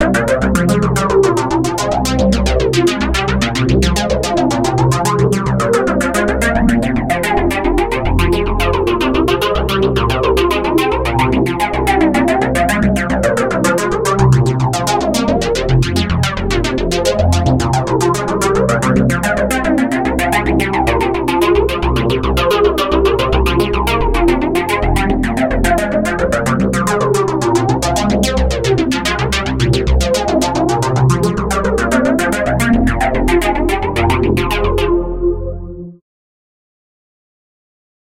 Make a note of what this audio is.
Trance Loop 2
Trance Loop from Serum
4x4-Records, Music, Trance, FX, EDM, Dance